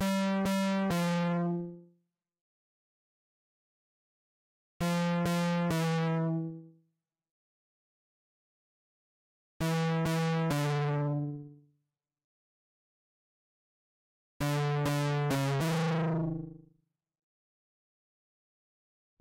100 12 inch nails twang gut 01
melodic, 12, loop, free, inch, twang, gut, sound, nails, organic